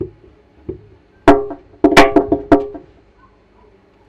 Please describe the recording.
hybrid roll
bingi, congo, rasta, reggae